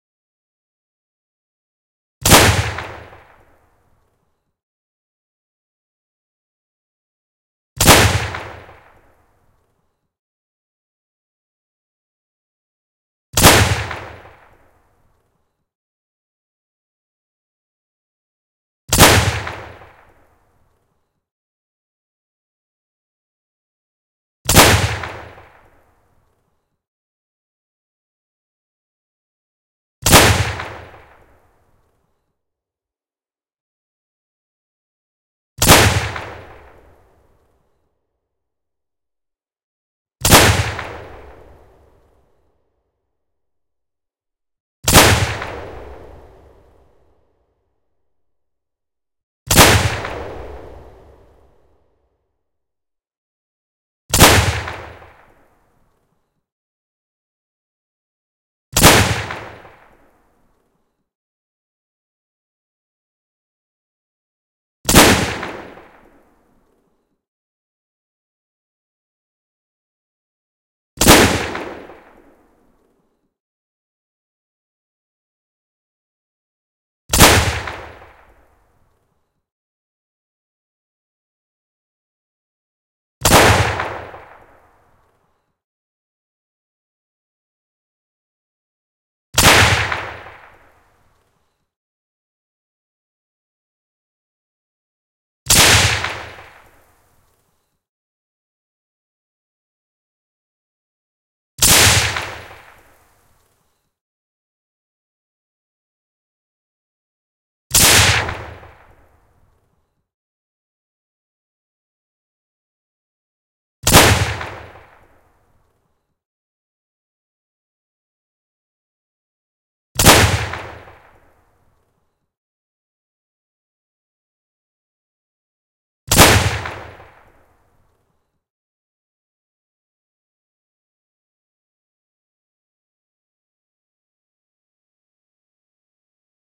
Gun shots
Differences between samples:
First have have different timing is the slider before the bang.
The next have varying bits of decay.
The rest have different frequencies emphasized. These were originally created with the idea of using them for a mobile game, so I wasn't sure which frequencies would translate best to small mobile speakers.